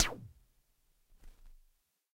electro harmonix crash drum